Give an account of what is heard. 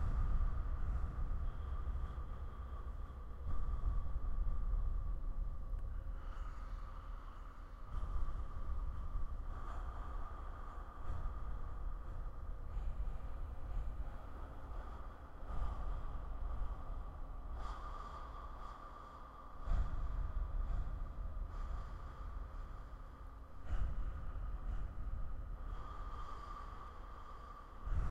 supernatural breath
I recorded myself breathing in audacity, slowed it down then added heavy echo + reverb. Can also be looped.